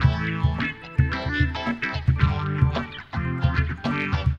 fun funk live